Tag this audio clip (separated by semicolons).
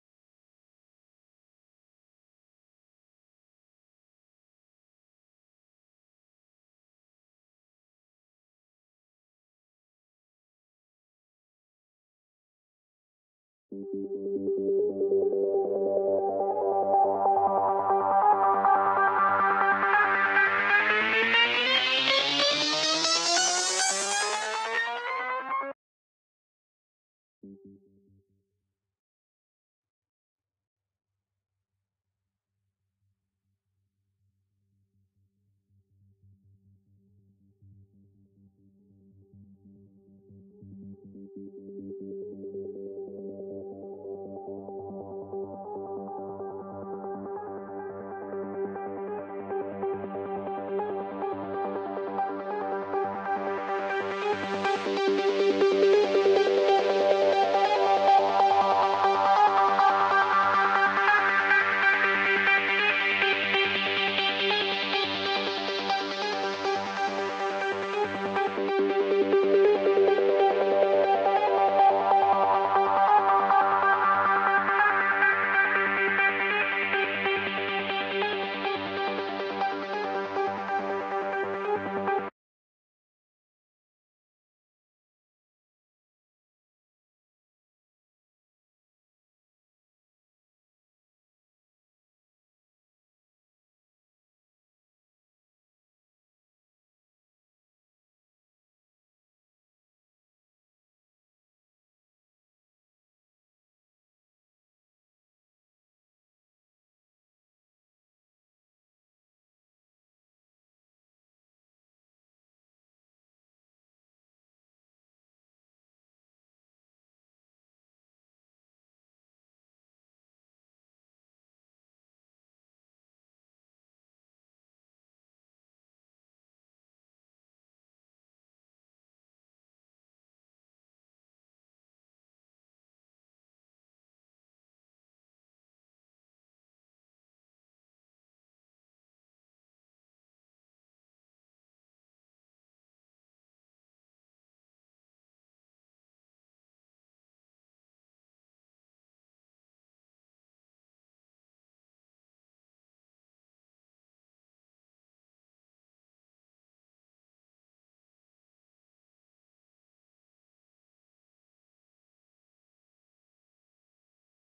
Acidline; Arp; Spire; Stem